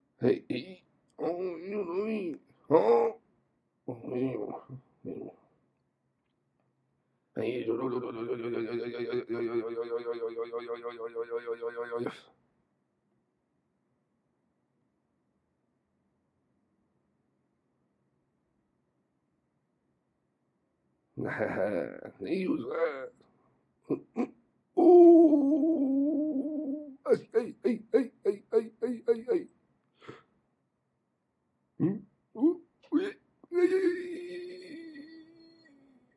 Sound of cowboy being distressed.
Cowboy, desert, south